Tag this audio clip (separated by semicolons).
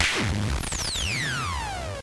tr-8; tube; metasonix-f1